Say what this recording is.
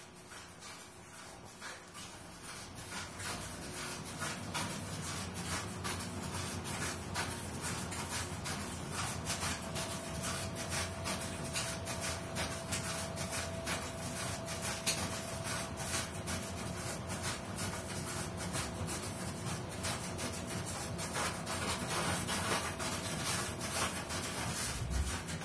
A recording of a dryer running with very rhythmic features. Recorded with Sony PCM-d50.
dryer, rhythmic